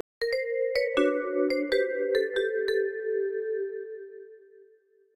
a sleep sfx that you usually hear in RPG game on choosing sleep option.